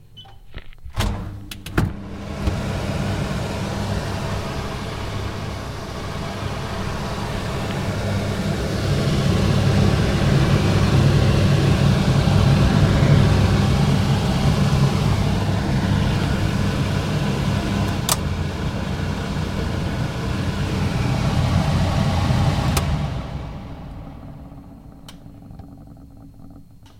Range Hood Air
A range hood switched on a ventilating.
Recorded with Sony TCD D10 PRO II & Sennheiser MD21U.